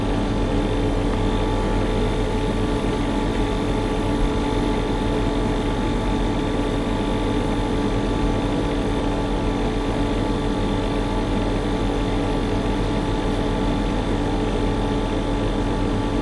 Recorded in a big garage near an old air conditioning unit using the Zoom H2n. The recording was slightly edited in Adobe Audition with the stereo expander effect.
ac, air, air-conditioning, conditioning, fan, hum, noise, Old, unit, ventilator